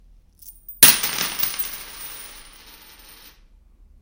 7 quarters fall on wooden floor
I drop seven American quarter dollar coins onto a wooden floor. Nice decay.
coins, money, coin, change, currency, quarter